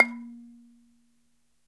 A balafon I recorded on minidisc.